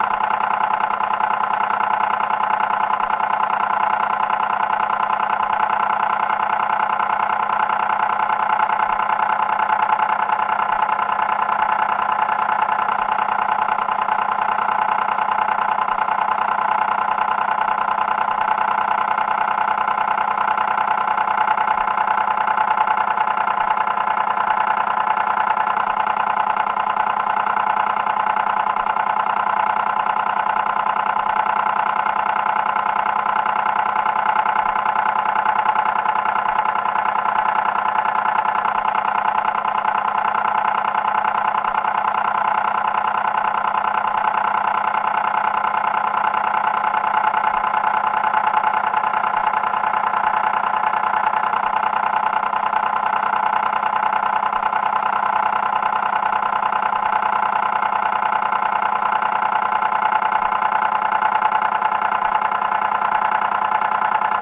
A mono recording of an analogue timed switch running. Loopable. Contact mic > Sony PCM-10